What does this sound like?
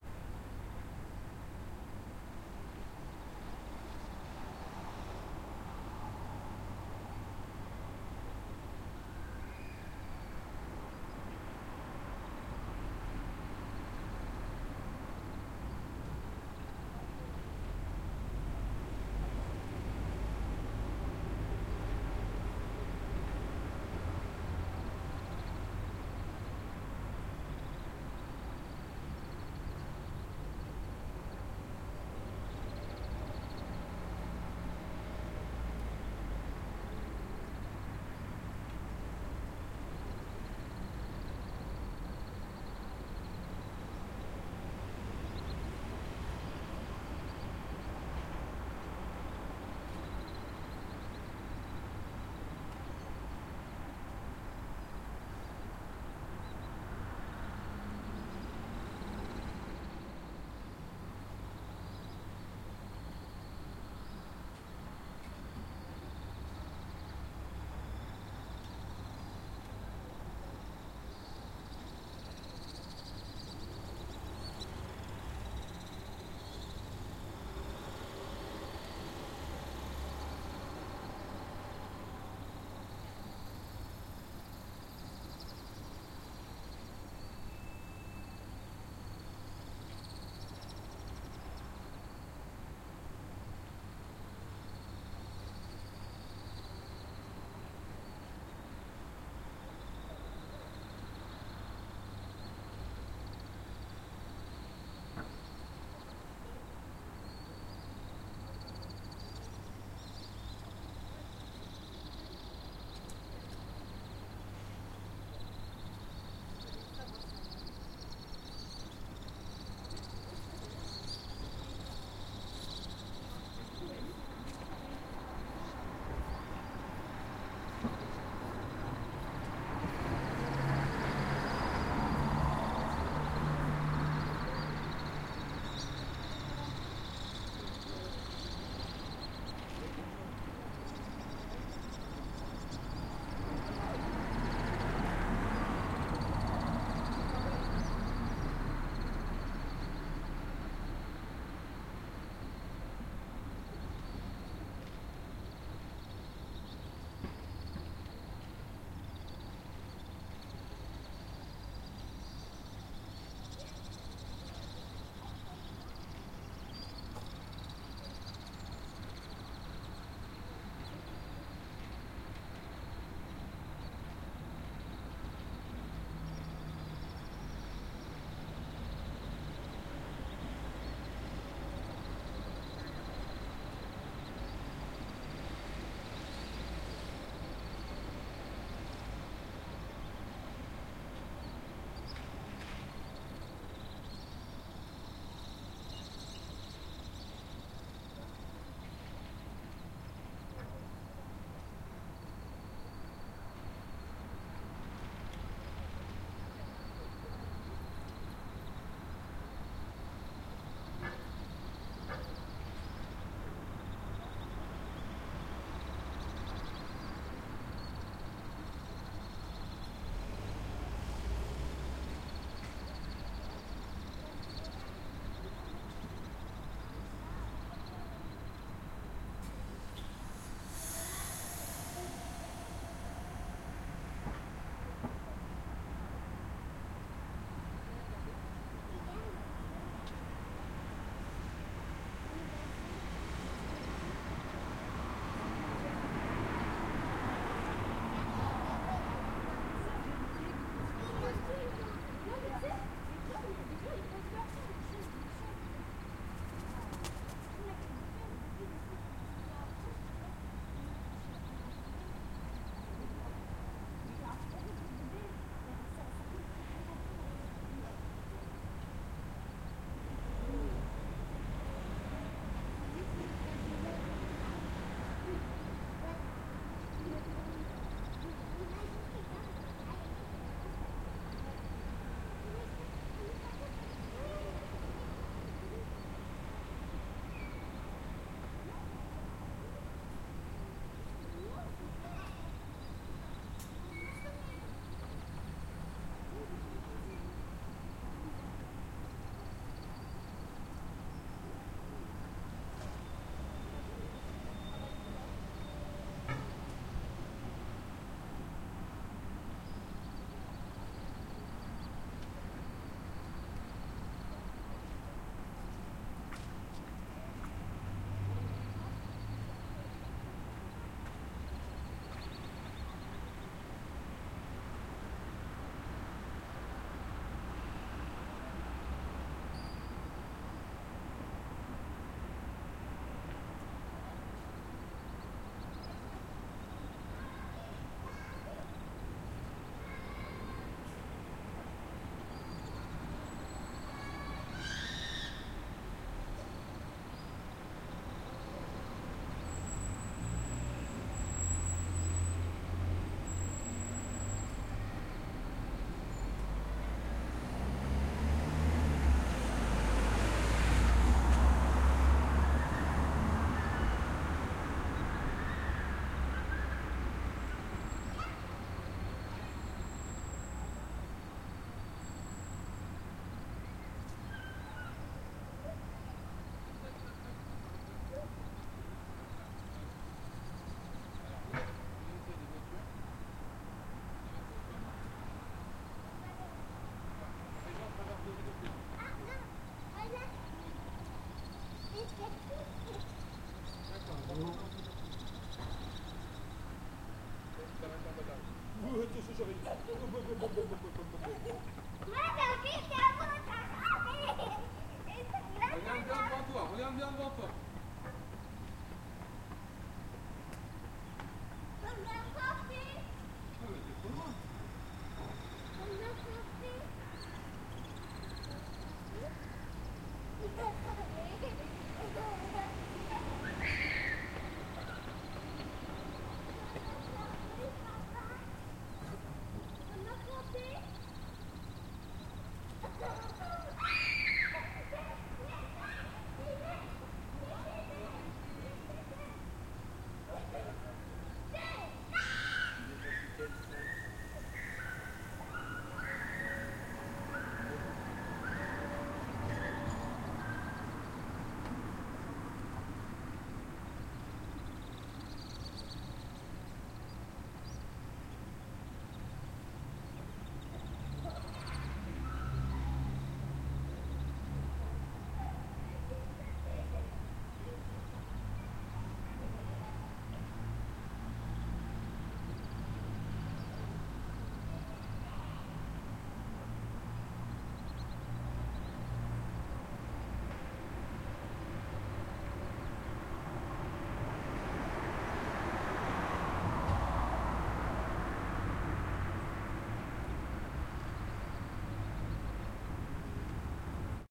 Ambient - quiet city - morning - passing vehicules - birds - insects - air - disparate citizents
Sunday morning in a city, with birds, insect, almost no wind.
Occasionnal citizents adult and kids passing (talking in french)
Occasionnal vehicules.